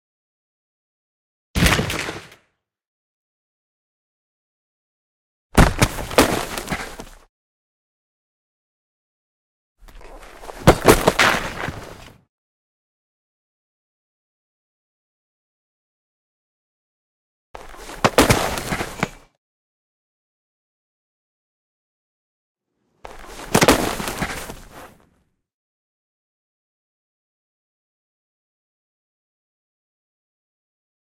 축구 태클하는 소리를 만들어봤습니다.
You can use the sound freely ( I made it )
누구나 쓸수 있는 무료음원입니다.
사운드가 도움이 되셨다면,
저희 유투브 채널 한번 방문 와주시면 감사하겠습니다 ^^
무료효과음, 무료BGM 꾸준히 업로드 하고 있으니 제 채널 한번 보고 가세요!!
감사합니다.
competition, football, game, goal, match, shouting, soccer, tackle, world-cup